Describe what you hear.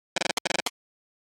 fizzy-crackles
Little crackles with a panning effect.